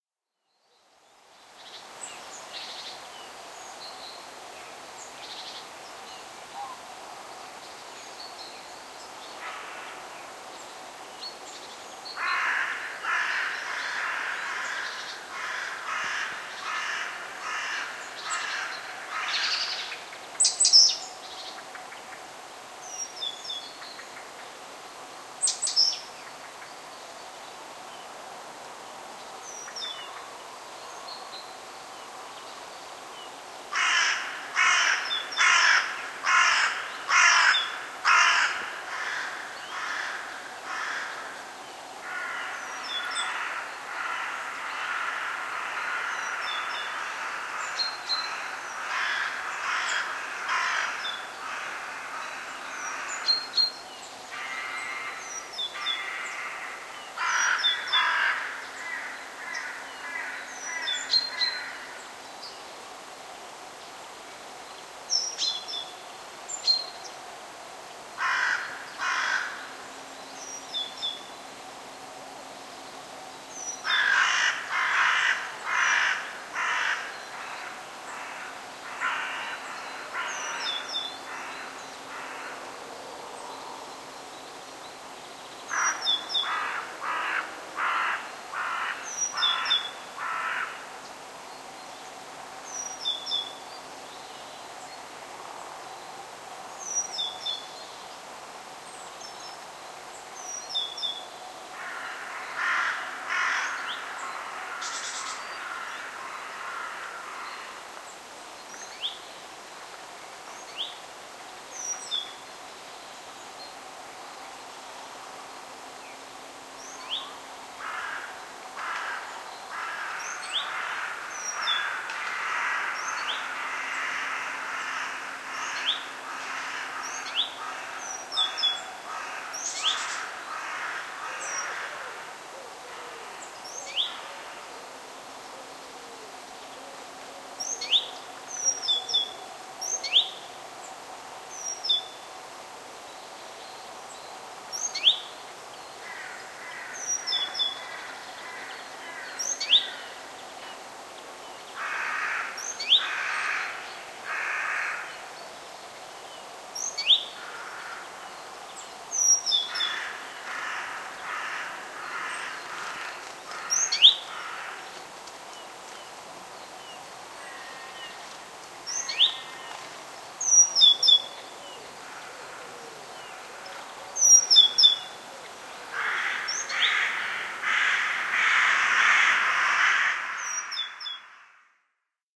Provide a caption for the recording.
Recorded February 2008. Forest surrounding, birds and crows singing, an owl and a single woodpecker appears, wing flutters. Distant cars, a distant helicopter and general distant rumble. Recorded with Zoom H2 build in microphones.